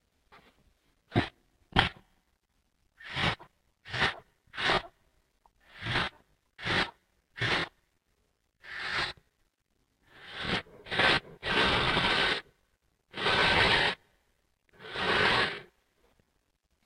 a dragon or monster -smelling
smelling, Dragon, wheeze